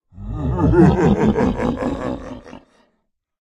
Evil demonic laugh
This is an evil laughter
psychotic, fear, mad, laugh, evil, insane, laughter